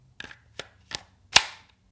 Loading magazine in an AR-15 (AR-15 S&W field-recording).
bullet, military, shooting, rifle, weapon, magazine, gun, reload